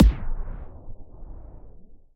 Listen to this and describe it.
ss-recordrundown SN
A thick post nuclear snare - great as a low volume accent to another snare.
electronic, snare